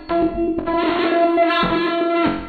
Mechanical Sequence 004
This time the input from the cheap webmic is put through a gate and then reverb before being fed into SlickSlack (a different audio triggered synth by RunBeerRun), and then subject to Live's own bit and samplerate reduction effect and from there fed to DtBlkFx and delay.
At this point the signal is split and is sent both to the sound output and also fed back onto SLickSlack.
Ringing, pinging, spectraly modified pingpong sounds result... Sometimes little mellodies.
This one is quite nice because it does not just sound metallic, but also there is a clear overdrive effect on this sound.
RunBeerRun, feedback-loop, Ableton-Live, SlickSlack, audio-triggered-synth